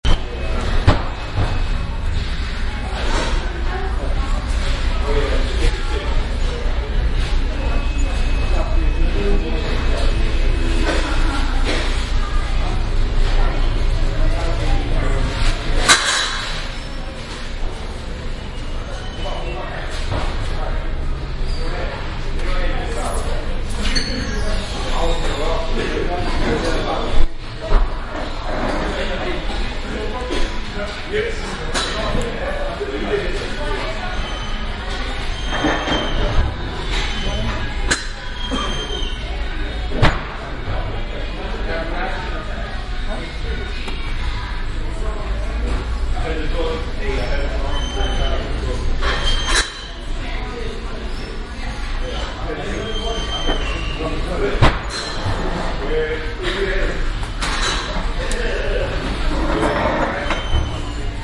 Tottenham Hale - Burger King in Retail Park